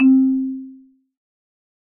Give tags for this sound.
wood instrument percussion marimba

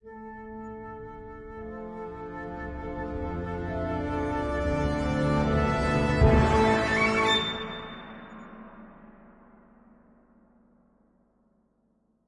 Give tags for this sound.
dramatic epic heroic musescore orchestral sting

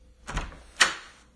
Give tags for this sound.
open wooden opening door

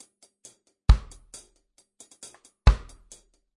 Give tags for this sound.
drum loops reggae

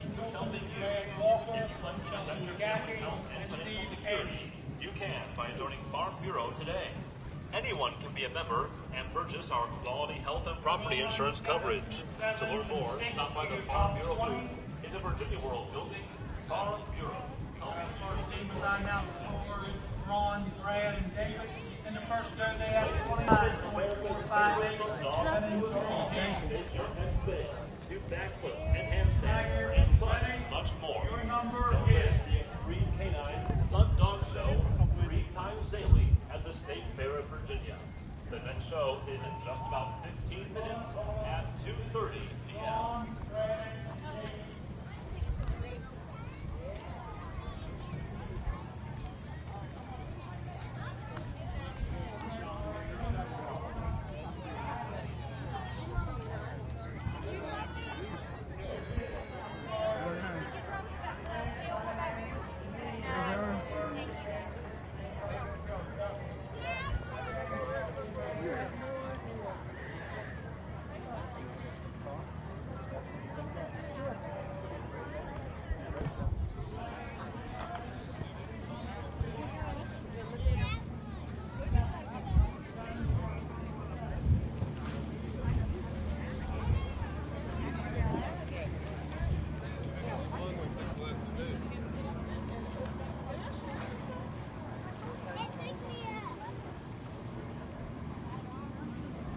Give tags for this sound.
state fair announcement

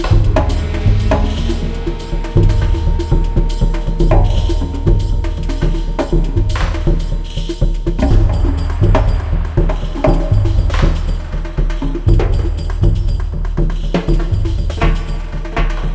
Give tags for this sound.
battle game gamedev gamedeveloping games gaming indiedev indiegamedev loop music music-loop victory videogame Video-Game videogames war

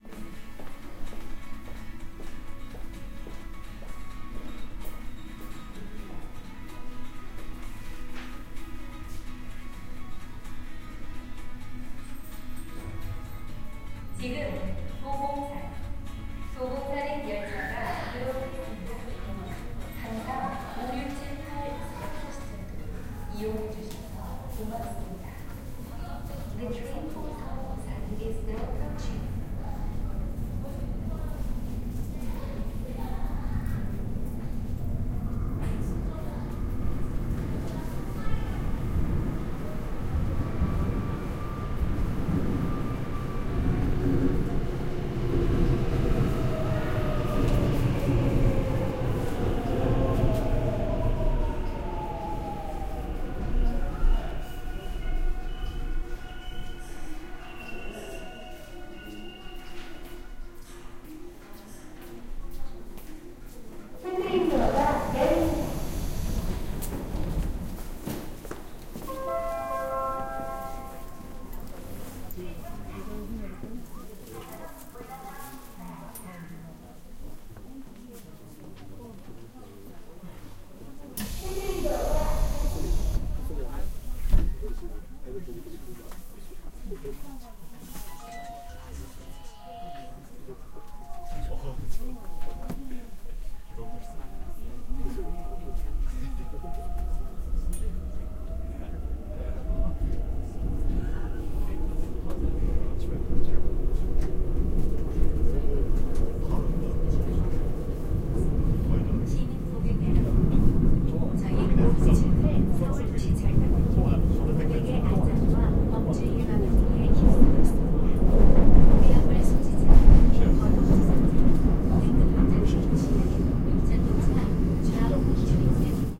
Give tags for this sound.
music field-recording korean english metro alarm voice korea seoul

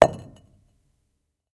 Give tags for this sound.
concrete impact stone strike

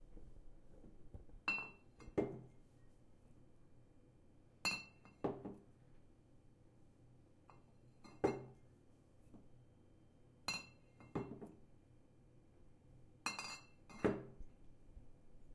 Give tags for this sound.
bottles glass

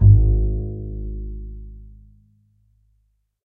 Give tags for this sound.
Acoustic Bass Double Instrument Plucked Standup Stereo Upright

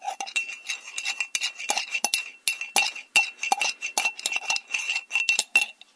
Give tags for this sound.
ceramic cup mug spoon stir

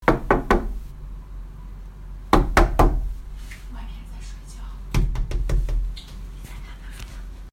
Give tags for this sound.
knock door wooden

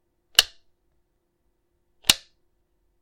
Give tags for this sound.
click flick flip off socket switch toggle

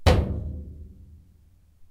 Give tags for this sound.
drum junk metallic scrap tom toms

